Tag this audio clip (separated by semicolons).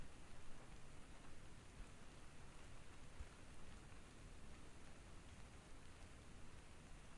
Early; Light; rain